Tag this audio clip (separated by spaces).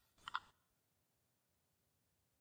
effect noise